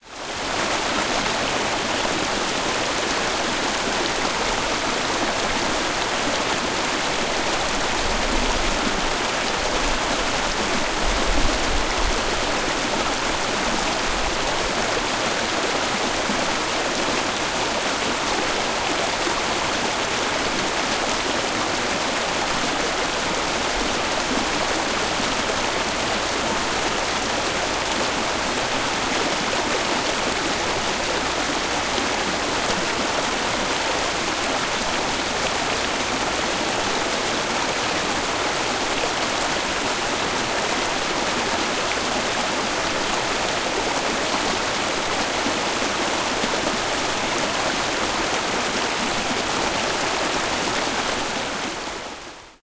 Stream 6 at Krka falls
A stream at Krka falls natural park.